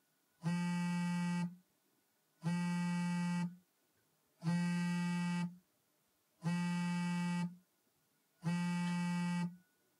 iPhone 6, vibrating on a hard surface